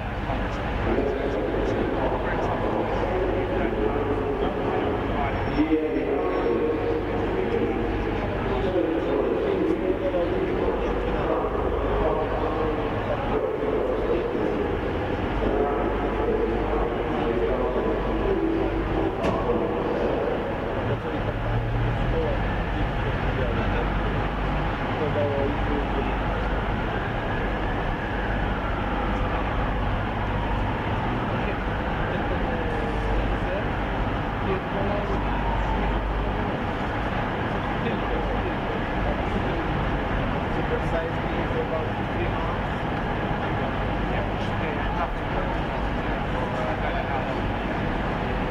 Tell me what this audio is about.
london ks x voices anncmt siren
General ambience and sounds on Kings Cross station London with announcement.
ambience, announcement, station-announcement, speech, train, platform, railway, station, field-recording